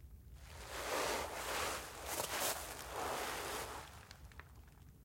spacesuit movement on crunchy grass 1
Movement of a spacesuit on crunchy grass
crunchy, grass, space, spacesuit